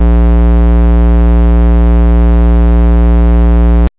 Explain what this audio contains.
LR35902 Square As2
A sound which reminded me a lot of the GameBoy. I've named it after the GB's CPU - the Sharp LR35902 - which also handled the GB's audio. This is the note A sharp of octave 2. (Created with AudioSauna.)
chiptune, fuzzy, square, synth